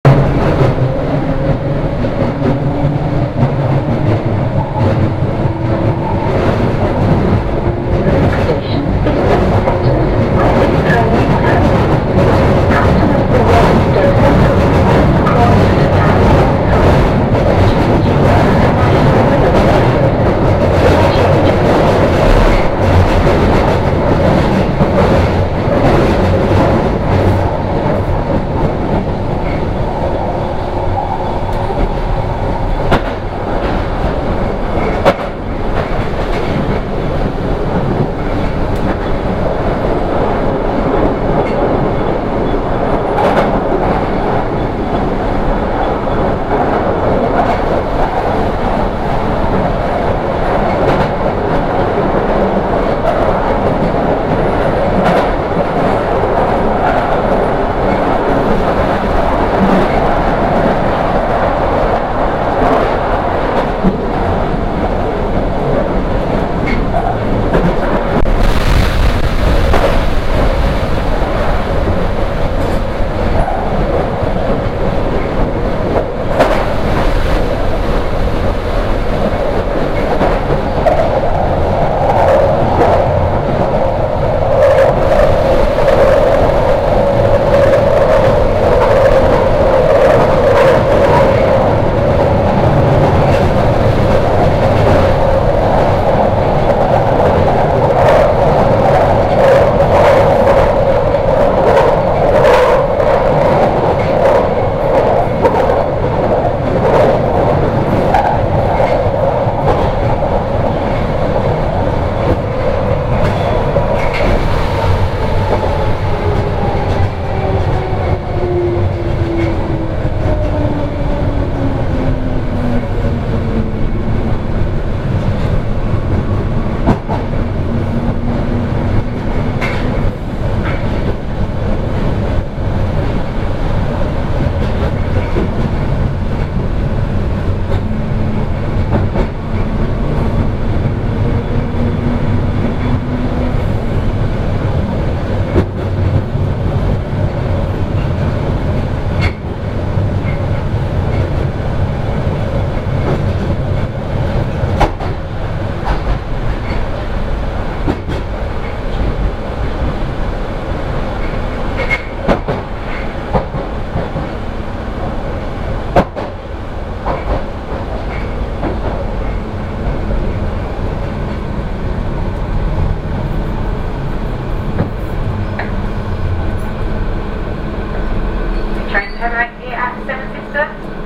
Victoria Line - Journey Ambience 1